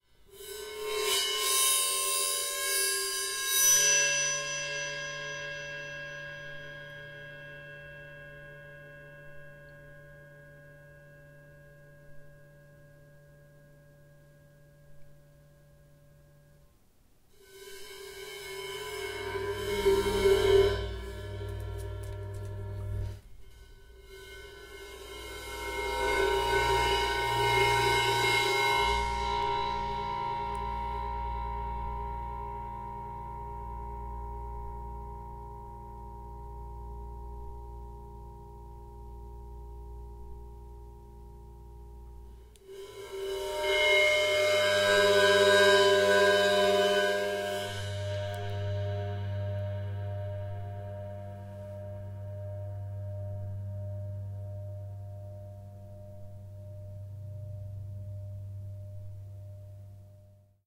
Cymbal Bow 18in
Bowing an 18in crash cymbal up close along the side. Couple of different options within. I recorded with a Zoom H4n. If you want more options with lower frequencies, check out the 'Cymbal Ride Bow 20in' in the pack.
18in, Bow, Bowing, Crash, Creepy, Cymbal, Horror, Instrument, Musical, Percussion, Scary, Screech, SFX, Suspense